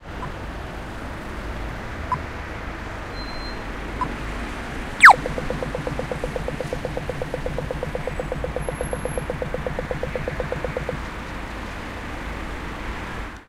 Crosswalk "go" sound
Dublin 2018